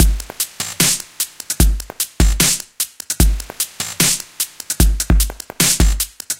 dubstep loop 150BPM
150, BPM, dubstep, hat, hi, kick, loop, snare